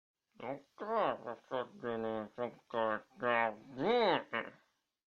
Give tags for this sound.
noise
male
voice

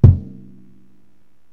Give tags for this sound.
bass
drums
set
kick
funk
rock
recording
punk
live

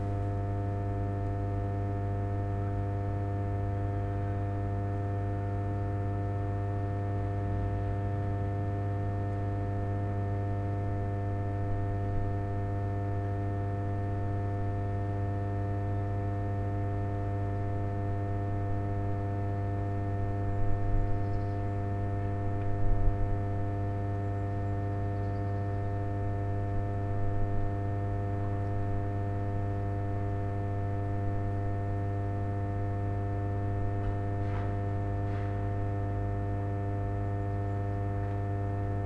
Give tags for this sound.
Creepy
Current
Electric
Electricity
Electro
Field-Recording
High
Power
Station
Substation
Voltage

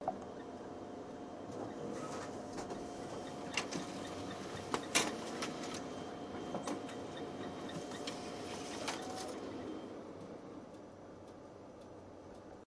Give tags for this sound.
photocopier
printing
print

